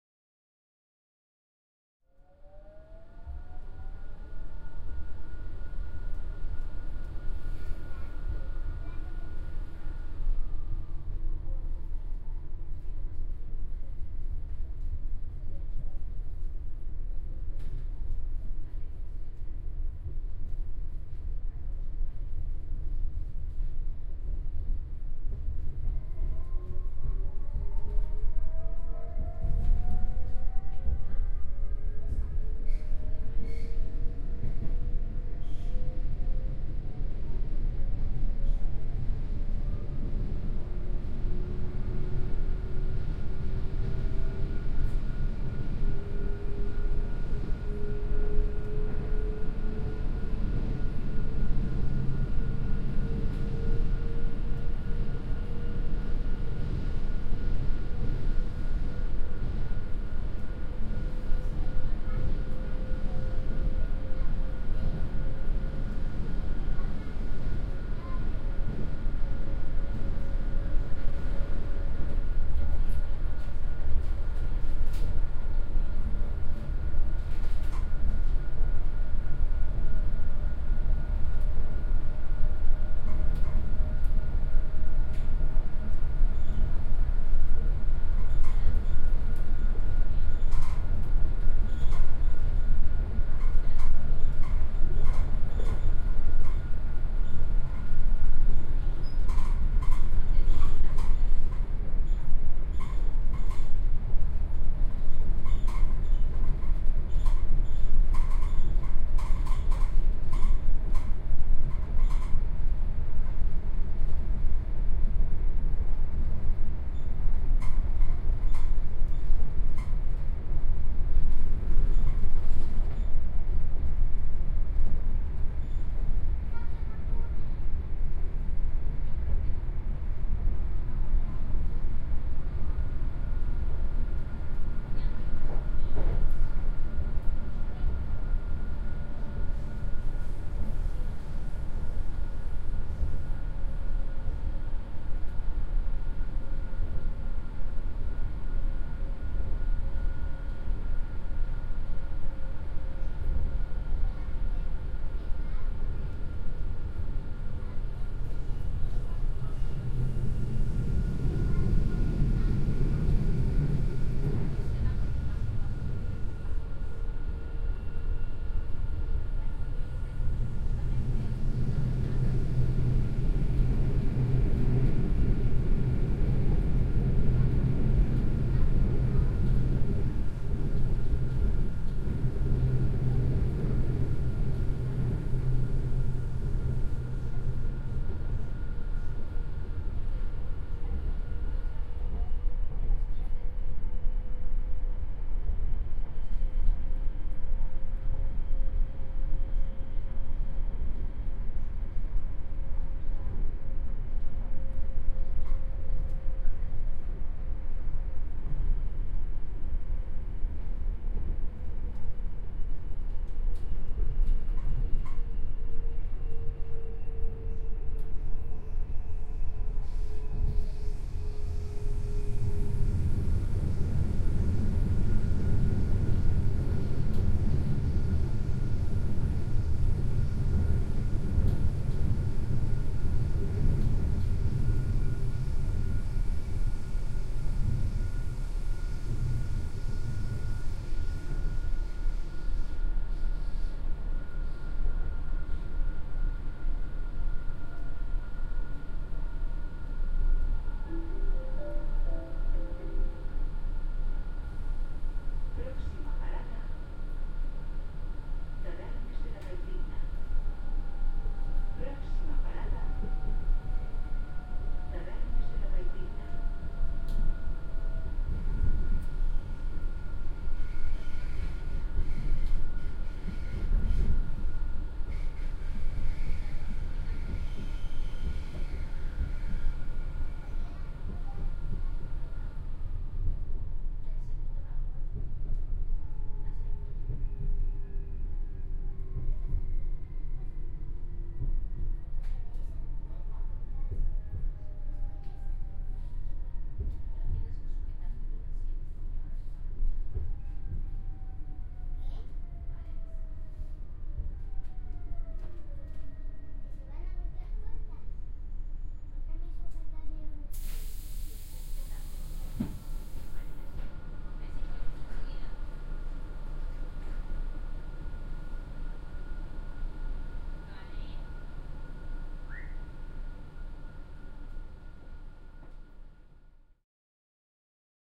Inside of the Gandia's train
This excerpt describes the inside sound of Gandia’s train on afternoon. (Valencia, Spain).
Recorded with binaural Zoom H4n about 19h30 on 13-11-2014